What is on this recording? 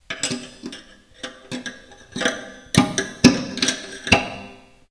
Kitchen-Sink-Put-Metal-Stopper-In
This is a stereo recording of me putting in the stopper/plug in my kitchen sink (it is a dual, stainless steel sink). It was recorded with my Rockband USB Stereo Microphone. It was edited and perfected in Goldwave v5.55. Enjoy!
drain, gargle, hole, kitchen, noise, noisy, plug, plughole, sink, stopper, water